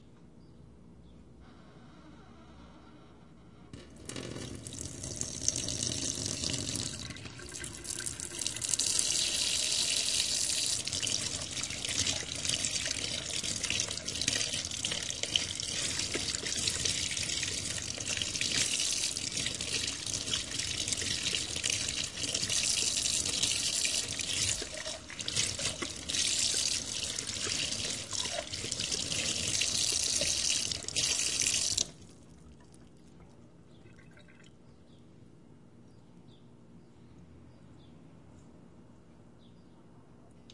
water faucet grifo lavando lavar fregadero sink lavabo